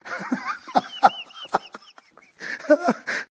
Guffaw(laughing)
My friend is laughing in a voice massage!